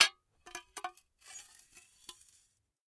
Small glass plates being scraped against each other. Plates tap and then scrape with a smoother sound. Close miked with Rode NT-5s in X-Y configuration. Trimmed, DC removed, and normalized to -6 dB.